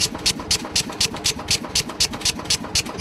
Billeter Klunz 50kg flat belt drive rotation quantized to 80bpm (orig. 82bpm) with 12 hits.